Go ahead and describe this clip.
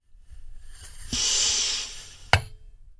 Bicycle Pump - Metal - Slow Release 07
A bicycle pump recorded with a Zoom H6 and a Beyerdynamic MC740.
Valve, Pressure, Metal, Gas, Pump